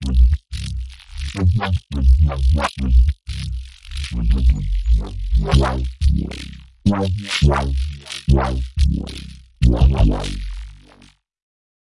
Neuro Bass by Sec.Lab
dark, Neurofunk, Jungle, synth, Bass, Neuro, dnb